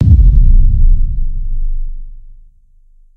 Hard DP04
This is a heavy bass-drum suitable for hard-techno, dark-techno use. It is custom made.
bass-drum, hard-techno, kickdrum, techno